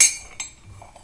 spoon cup 2
Dropping a spoon in a cup.
china, cup, kitchen, spoon